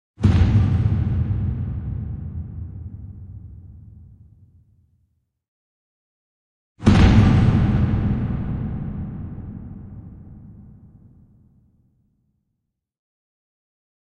Bombastic thump - a similar sound is heard as part of every recent movie trailer. I took my Crash02 and processed the spit out of it - lowered the pitch - added massive echo - brought up all the lows. Two versions - the second a bit more processed than the first.
crash, low-frequency, soundeffect